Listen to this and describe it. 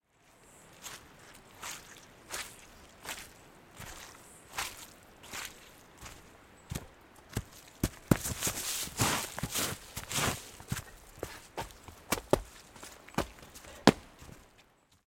Hiking on Soft Ground 1 - Through Mud Then Slipping
Sound of heavy footsteps on soft ground and through mud. Included bit near the end that sounds like me slipping on the mud.
Recorded at Springbrook National Park, Queensland using the Zoom H6 Mid-side module.
steps, mud, ground, walking, footsteps, feet, foot, hiking, heavy, footstep, fall, walk, slip, step